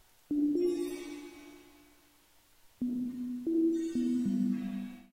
little something i made in supercollider